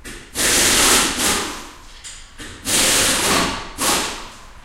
In a three-bay oil change shop. Two loud blasts of a bassy pneumatic lug wrench.
Recorded using the M-Audio Microtrack and its stock stereo mics.
ambience, field-recording, garage